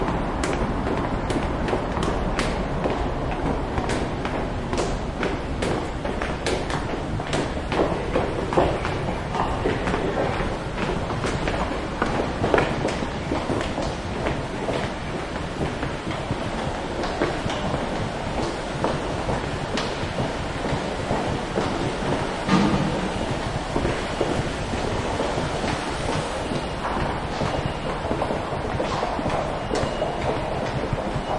Japan Tokyo Train Station Footsteps and Noises 3
One of the many field-recordings I made in and around train (metro) stations, on the platforms, and in moving trains, around Tokyo and Chiba prefectures.
October 2016.
Please browse this pack to listen to more recordings.
departing, tram, metro, departure, beeps, rail, transport, Japan, walking, train, depart, announcement, railway-station, underground, announcements, subway, railway, field-recording, public-transport, train-ride, Tokyo, train-tracks, platform, footsteps, station, train-station, tube, arrival